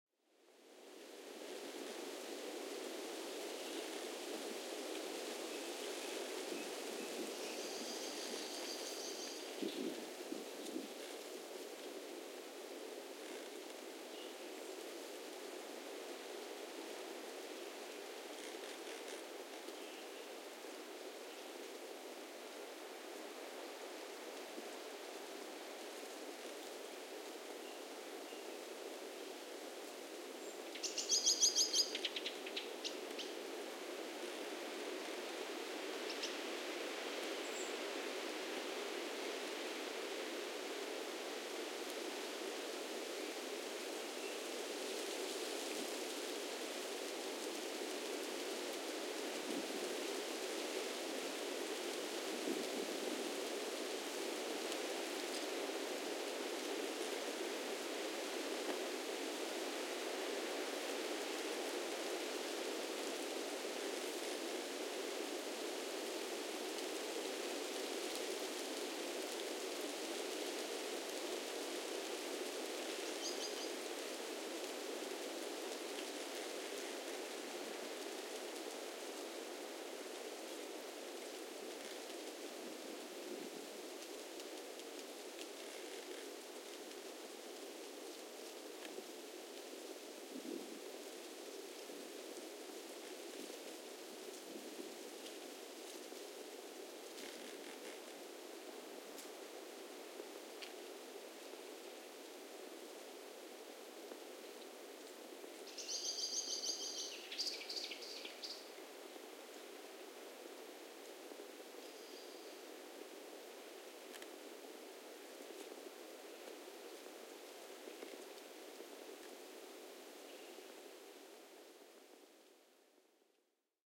Wind in forest with creaking tree
In this sound recording we hear wind in the forest with a tree that creaks softly
trees, ambience, creaking, ambiance, forest, nature, birds, wind, ambient, birdsong, field-recording, tree